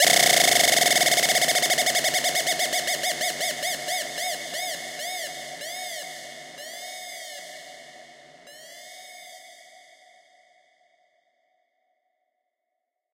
Wheeling Down
rising, sweep, sweeper, riser, effect, fx, sound-effect, sweeping